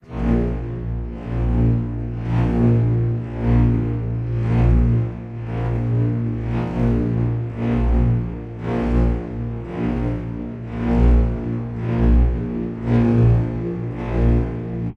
an electrical violin sound